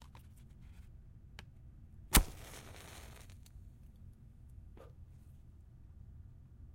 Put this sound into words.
Striking a match on a strike strip